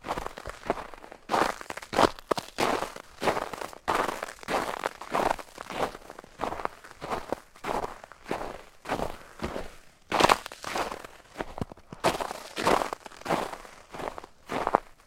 Walking in a couple inches of snow that covers gravel. Similar to "walking in snow 1" but there is less snow and more ice and gravel.